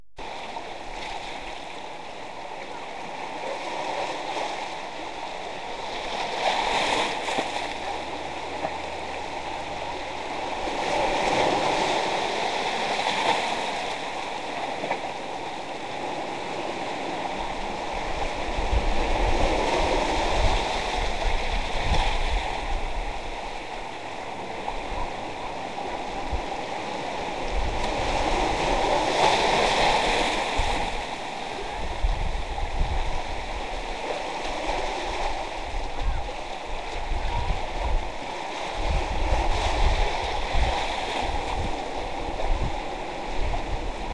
Waves lapping up on the shore, recorded on a beach in Breton. Distant sounds of children playing.
coast, beach, shore, splash, wave